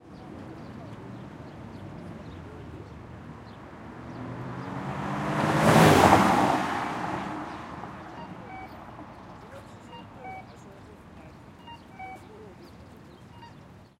5 - Forbes & Morewood Intersection - Trk-8 South
Field Recordings from May 24, 2019 on the campus of Carnegie Mellon University at the intersection of Forbes and Morewood Avenues. These recordings were made to capture the sounds of the intersection before the replacement of the crossing signal system, commonly known as the “beep-boop” by students.
Recorded on a Zoom H6 with Mid-Side Capsule, converted to Stereo
Editing/Processing Applied: High-Pass Filter at 80Hz, 24dB/oct filter
Recorded from the south side of the intersection.
Stuff you'll hear:
Car speeds through yellow light
Crossing signal (0:06)